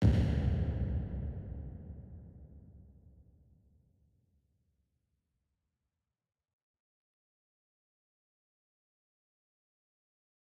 Reverbed kick drum